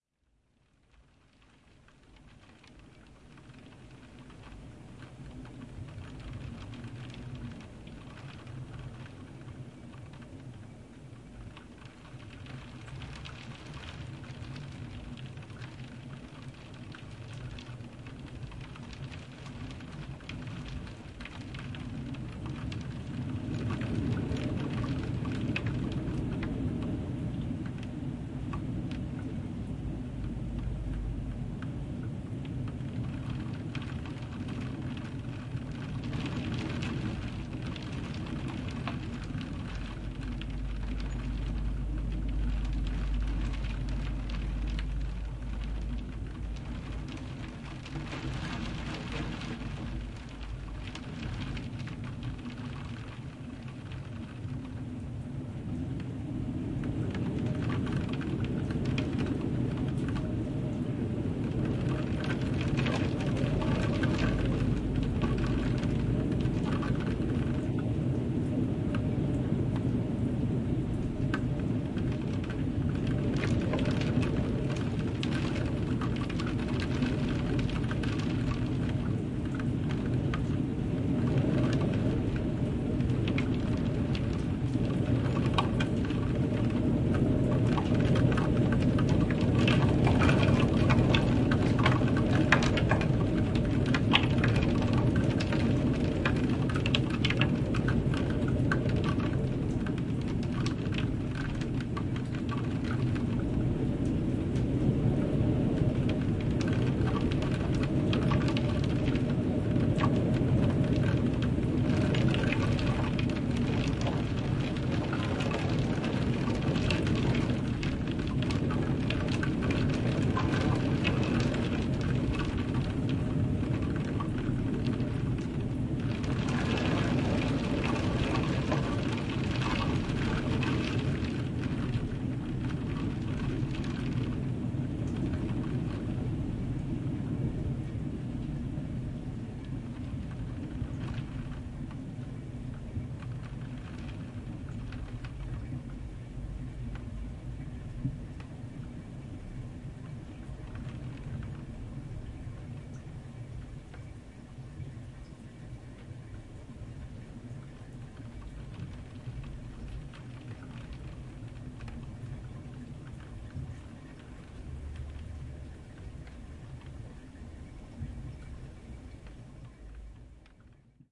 A stereo recording of wind and rain on a window. Rode NT-4 > FEL battery pre-amp > Zoom H2 line in.